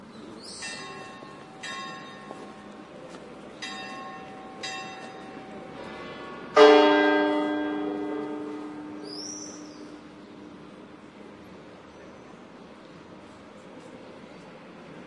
several bells striking near church of Santiago el Real (Logroño, Spain), city ambiance in background. Shure WL183, Fel preamp, PCM M10 recorder